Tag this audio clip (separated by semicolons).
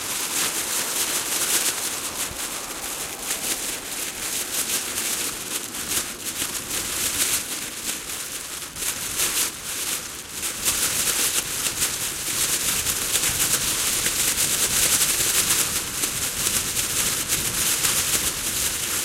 field-recording; flapping; plastic; wind